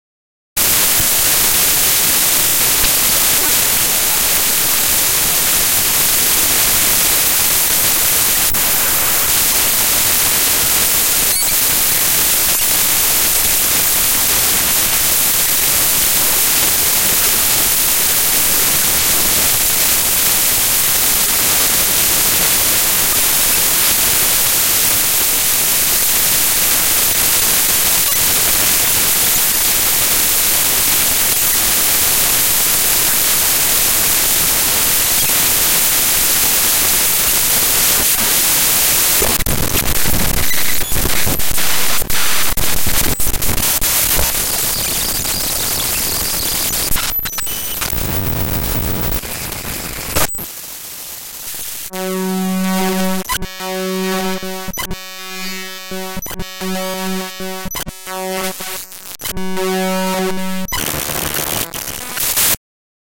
Glitch Noise 3
These are glitch sounds I made through a technique called "databending." Basically I opened several pictures in Audacity, and forced it to play them as sound files.
digital, distortion, glitch, harsh, lo-fi, noise